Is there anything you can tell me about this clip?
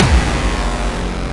HardcoreKick Seq03 07
A distorted hardcore kick